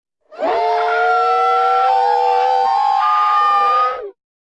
Leviathan writhing in pain
Inspired by the Monster Hunter videogame franchise. Made these sounds in Ableton Live 9. I want to get into sound design for film and games so any feedback would be appreciated.
evil, giant-monster, horror, monster, shriek